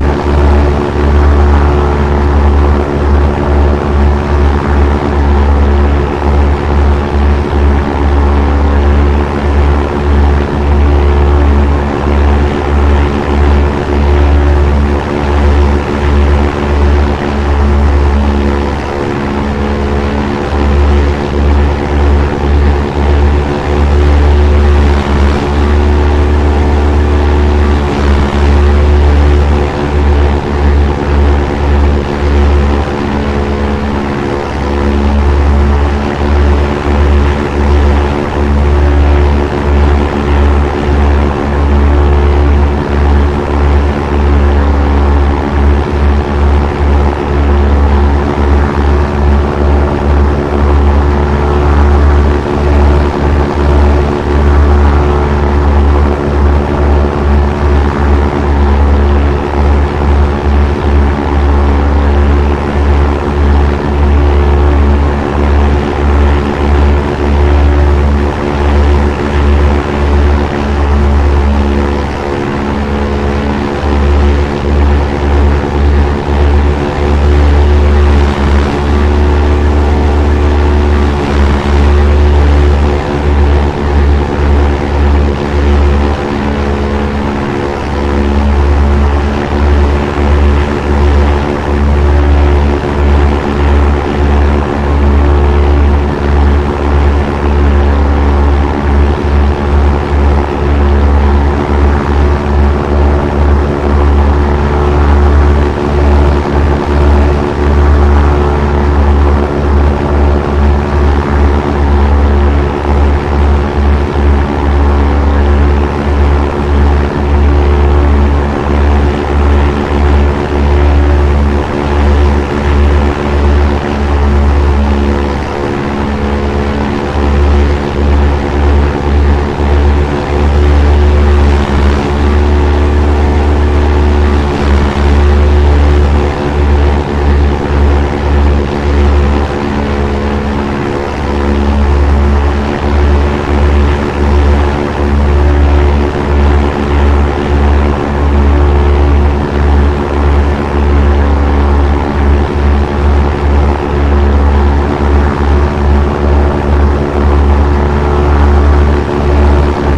Double Prop plane
Double propeller airplane flying through the air. Can be looped.
Aircraft, Airplane, Bomber, Double, Engine, Engines, Flying, Game, Loop, Plane, Prop, SFX